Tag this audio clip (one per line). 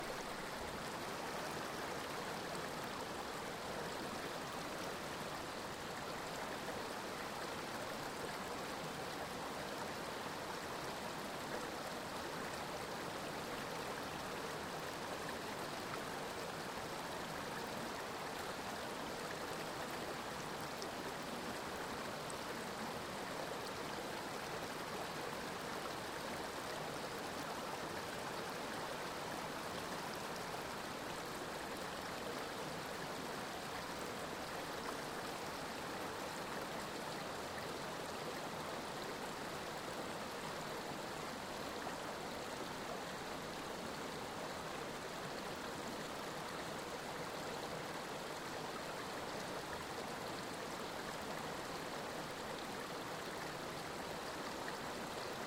water-river-running
Calm
water